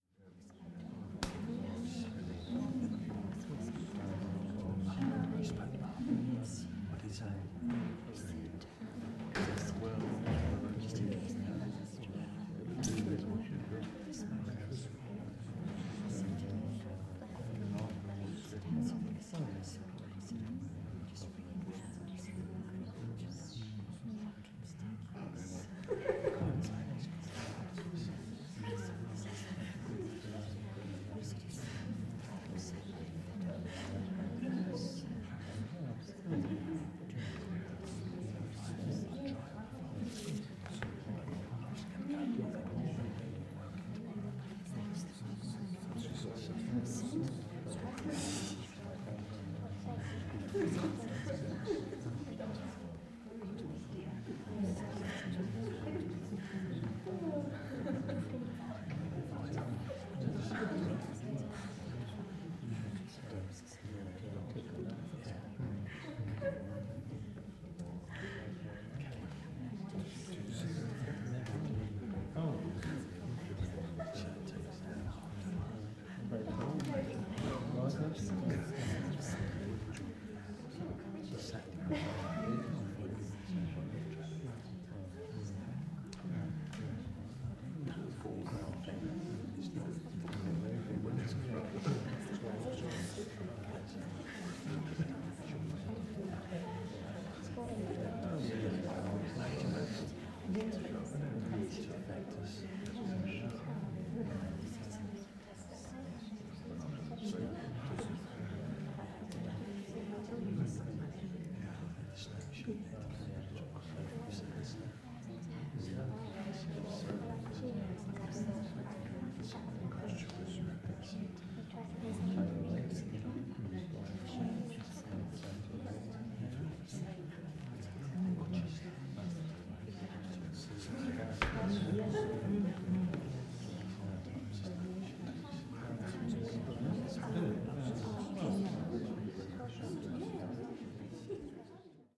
About 15 people quietly chatting in a large country-house dining room.